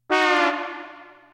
trombones fall
Trombone fall
Created on a Casio WK 3700 keyboard and recorded on Cubase SE DAW.
slide, horn, brass, trombone